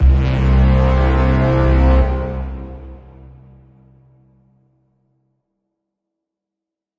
2braaaam1 ir -12
A collection of "BRAAAMs" I made the other day. No samples at all were used, it's all NI Kontakt stock Brass / NI Massive / Sonivox Orchestral Companion Strings stacked and run through various plugins. Most of the BRAAAMs are simply C notes (plus octaves).
arrival, battle, braaam, brass, cinematic, dramatic, epic, fanfare, film, heroic, hit, hollywood, inception, movie, mysterious, orchestral, rap, scifi, soundtrack, strings, suspense, tension, trailer